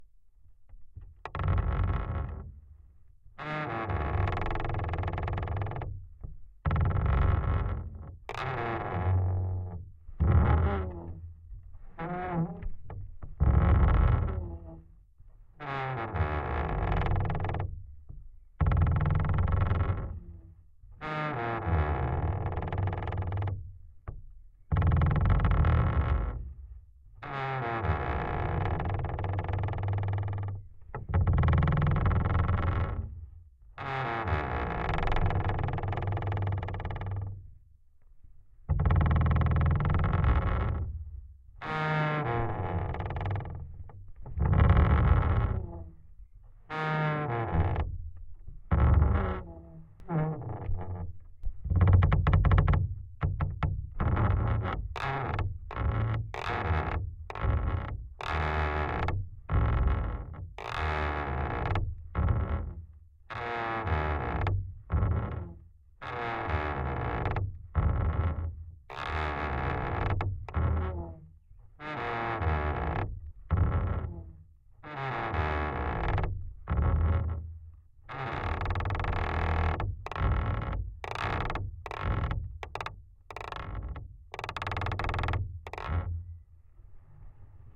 Creaky Garden Door 2
Creaky Garden Door recorded with sound devices 722 and jez riley french contact microphones.